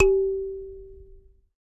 SanzAnais 67 G3 mezzoforte
a sanza (or kalimba) multisampled
percussion, kalimba, sanza, african